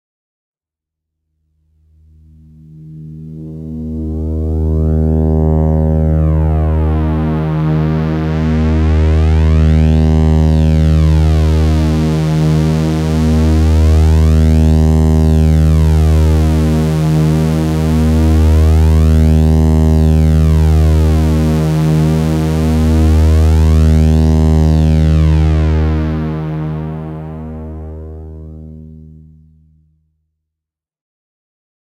Gakken with phaser 0.5 minute drone
These samples come from a Gakken SX-150, a small analogue synthesizer kit that was released in Japan 2008 as part of the Gakken hobby magazine series. The synth became very popular also outside of Japan, mainly because it's a low-cost analogue synth with a great sound that offers lots of possibilities for circuit benders.
sound, kit, sx-150, noise, japan, electronic, synth, analog, hardware, gakken, toy